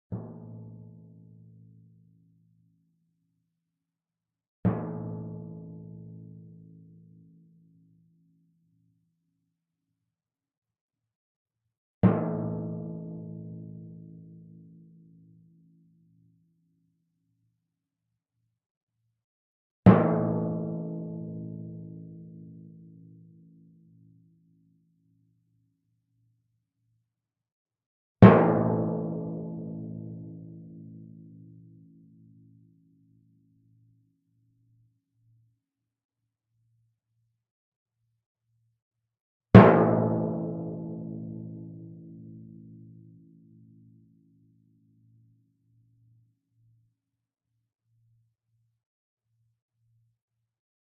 timpano, 71 cm diameter, tuned approximately to A.
played with a yarn mallet, about 3/4 of the distance from the center to the edge of the drum head (nearer the edge).